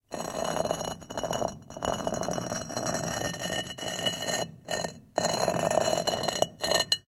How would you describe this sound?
stone on stone dragging1
stone dragging on stone
concrete, stone, grinding